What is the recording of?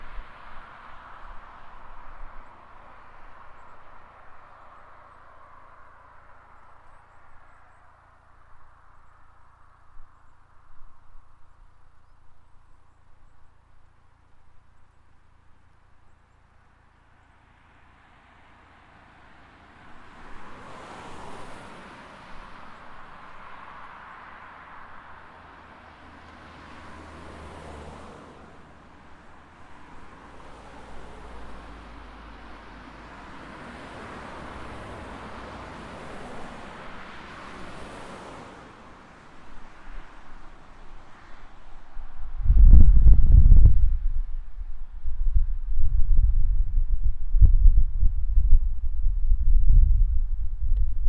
Taken in a rest area, traffic going by. Country road so no town centre noises.
car, vroom, vehicle